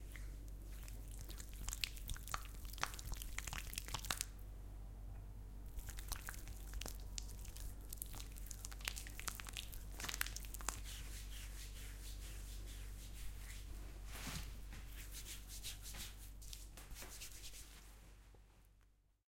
Very Creamy liquid rubbed between hands and over legs.
Rubbing a thick cream between hands, between fingers and then over legs.
cream, creamy, finger, fingers, gentle, hand, hands, leg, legs, liquid, OWI, rub, rubbing, soft, swipe, swiping, very, wipe, wiping